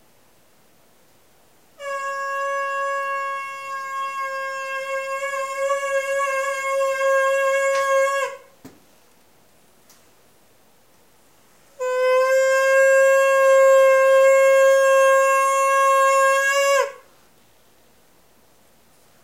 hand maded reed
in cane river
trumpet folklore